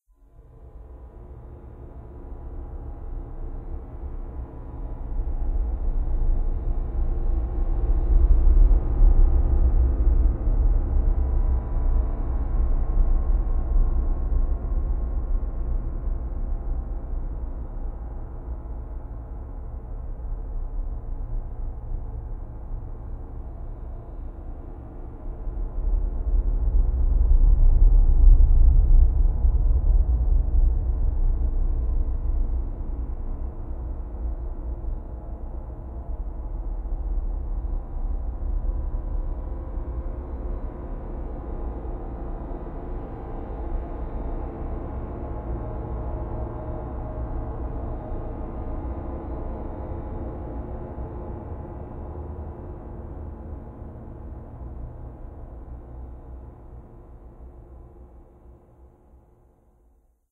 A creepy dark ambience sound made from an old recording of a big tree waving in harsh wind. I gave it a lot of reverb and roomtone and then applied a vocoder which gave it a kind of rumbling effect with a lot of bass.
Ambiance, Ambience, Ambient, Atmosphere, Audacity, Background, Cinematic, Creepy, Dark, DR-40, Drone, effect, Film, Horror, Movie, noise, Processing, Scary, suspense, Tascam, Travel, wind